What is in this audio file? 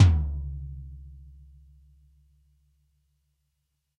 Dirty Tony's Tom 16'' 062
This is the Dirty Tony's Tom 16''. He recorded it at Johnny's studio, the only studio with a hole in the wall! It has been recorded with four mics, and this is the mix of all!
16, dirty, drum, drumset, kit, pack, punk, raw, real, realistic, set, tom, tonys